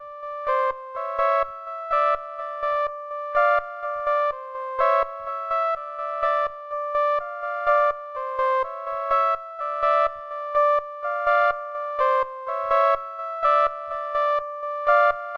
This one is created with Absynth 5 in Bitwig Studio.
5, absynth, bitwig, pad, studio